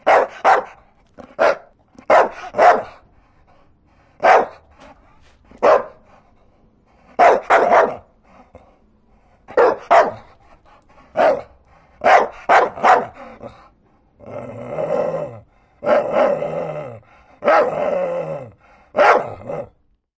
Dog Bark Staffordshire Bullterrier
Staffordshire Bullterrier dog barking at my blimp.
Recorder: Sound Devices 722
Microphone: Sennheiser MKH60 & MKH30 in M/S
Decoded to XY.
722, bullterrier, mid-side, mkh-30, m-s, sennheiser, mkh-60, bark, staffordshire, ms, dog, sound-devices